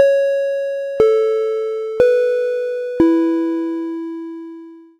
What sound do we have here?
4-tone chime WESTMINSTER
A simple and short 4-tone chime that sound like westminster.
bell, chime, ding, microphone, pa, ping, ring